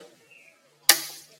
A short metalic bang that has a pitch increased by +12. Recorded using a Mac computer microphone.
bang, f13, fnd112, metalic